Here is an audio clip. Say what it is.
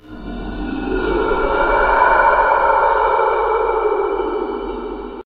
A scary monster I made in Audacity out of my own voice.